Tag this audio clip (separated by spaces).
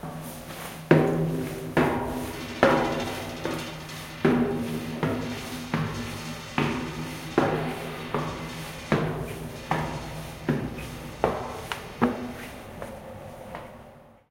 clang steps stairs foot walking metal footsteps metallic